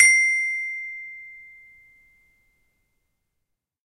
children instrument toy xylophone